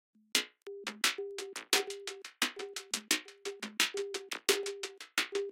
cloudcycle.stratus-hh.loop.4-87bpm
hihat fx loop - 87 bpm